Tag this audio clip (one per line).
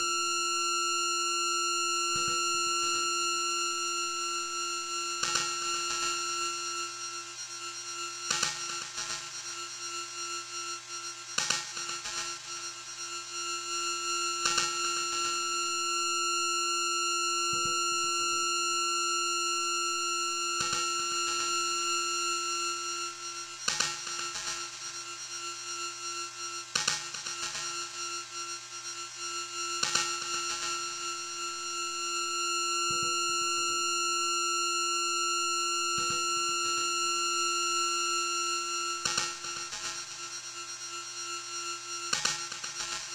atmosphere
electro
mutant
illbient
movie
creepy
fear
ambient
drone
lab
dark
horror
monster
film
bakground
effect
pad
lead
abstract
noise
filter
criminal
cinematic
alien
drama
experiment
granular
ambience
bad
funk